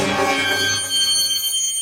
scarysplit - cutrev6
scary, noise, industrial, aggrotech